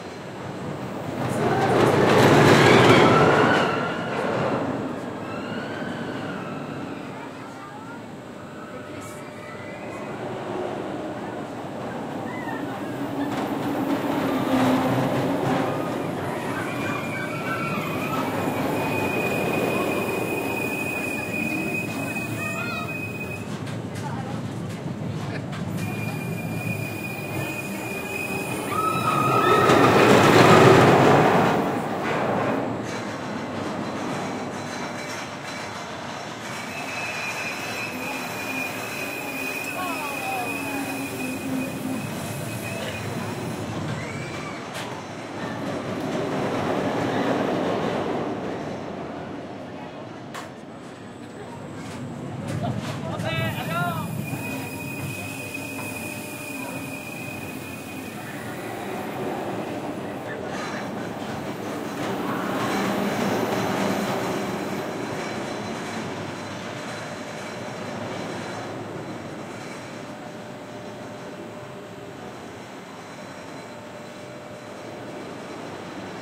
The sounds of a roller coaster.
Achterbahn, amusement, atmosphere, coaster, environment, park, roller, themepark, thrill